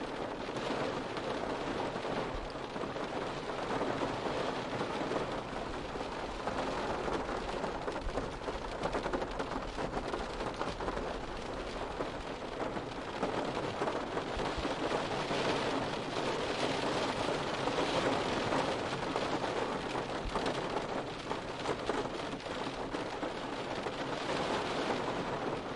Sound of rain inside a car (Roof). Loop (00:25sc). Others "rain inside car" sounds :
Gear : Rode NT4.